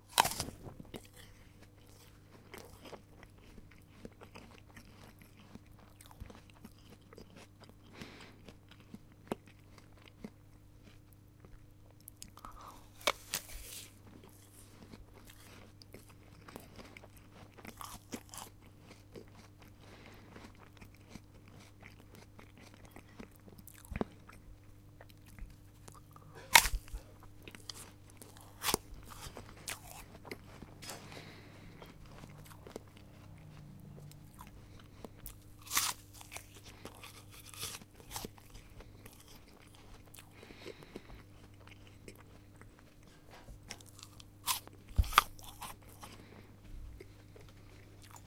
Eating apple
consuming, eating, food